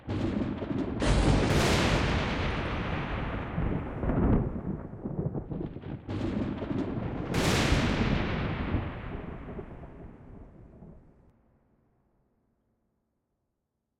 dry-thunder
Loads of dry thunder.
ambient, dry-thunder, field-recording, lightning, nature, rain, rumble, storm, thunder, thunderclap, thunder-storm, thunderstorm, weather, wind